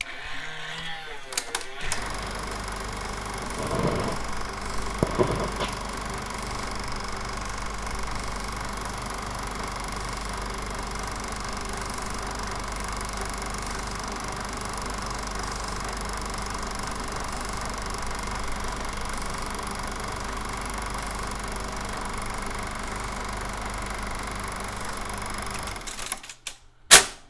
Proyector16mm-2
Uzi's 16mm film projector playing , turn in , turn off
16, environmental-sounds-research, mm, projector, movie, film